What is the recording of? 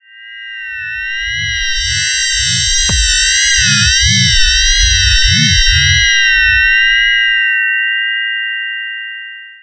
eerie high-pitched synth sound